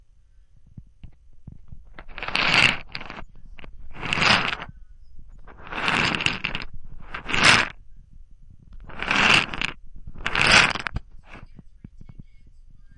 Recorded on a ZOOM Digital H4N recorder with a hand made crystal microphone attached. The sound is a pill bottle being rocked back and forth gently.